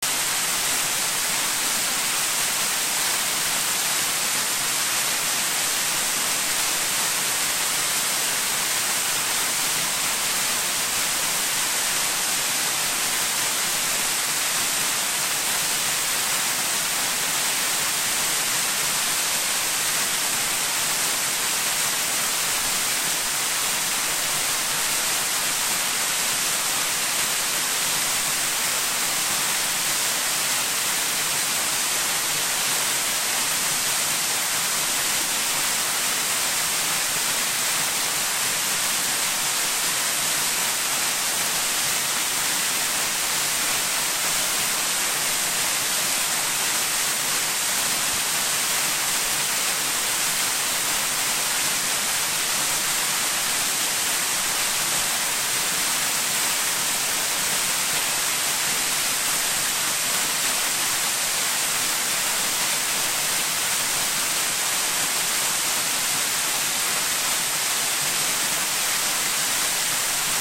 Waterfall/Cascade sounds
This is the sound of a 8 meter waterfall, cascade that is that big but with a high flow of water.
creek, field-recording, nature, stream